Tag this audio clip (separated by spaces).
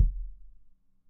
carton cardboard bassdrum subbass kickdrum